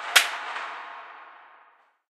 JBF AntExplode
An ant explodes. A percussive, processed sound.
crack, manipulated, manipulation, percussive, processed, rhythmic, snap